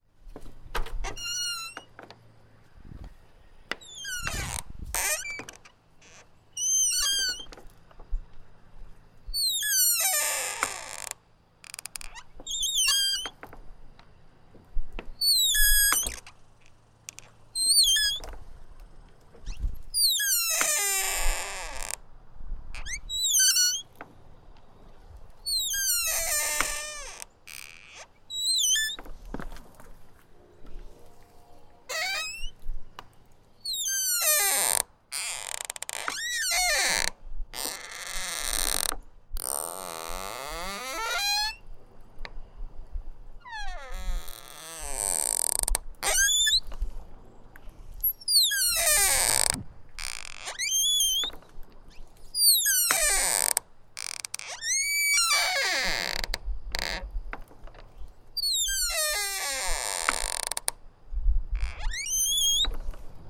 door residential front door squeaky open close loud creak kinda plastic
open, loud